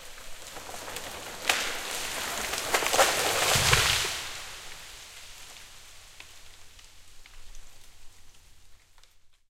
timber tree falling 1
sound of tree falling
falling, timber, tree